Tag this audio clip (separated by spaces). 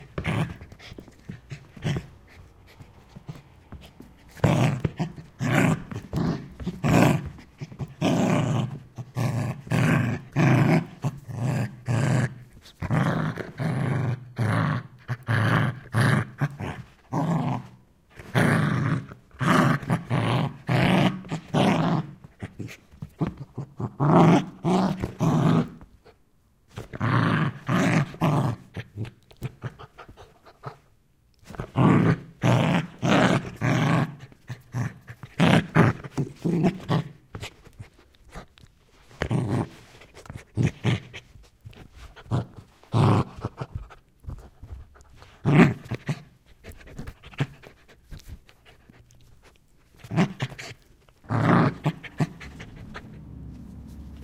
aggression anger angry bark barking dog growl growling grumble grumbling pd play snarl snarling toy wolf